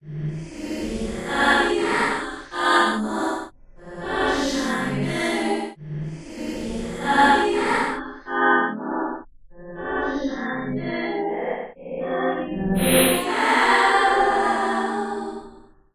A shuffled, looped and processed sample from the spectrum synth room in Metasynth. There original sample has been sped up, and the highs have been emphasized for a breathy effect. The original input was myself singing part of the song Hello by Dragonette.
dragonette, hiss, spectrum-synthesizer, loop, metasynth, processed-voice, voice, female-voice, voice-sample